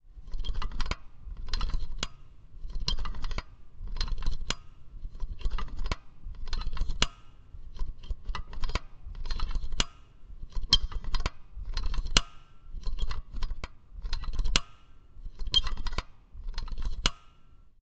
handle gear selection - classic -1500
For this recording, I took an old recording of the inner mechanics of an ice cream scoop, and using Logic, manipulated the pitch (down 1500 cents) using "Classic" mode. As a result of classic mode, the time of the recording changed in accordance with the pitch shift.